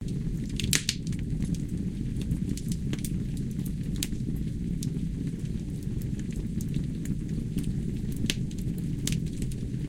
woods burning into the fireplace
wood, fireplace, burning